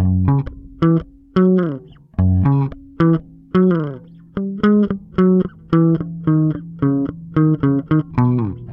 Electric bass guitar loop 3 bpm 110
Thank you for listening and I hope you will use the bass loop well :-)
110, 110bmp, bass, bmp, guitar, loop